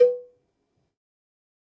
MEDIUM COWBELL OF GOD 008
cowbell, god, more, pack, drum, real, kit